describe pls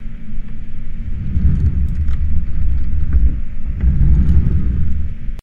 SOUND - Computer Desk Keyboard Tray - Moving (LOOP)
Loop: Keyboard tray on large wooden desk moving back and forth.
Very deep and rumbling, some medium hiss from computer in backround.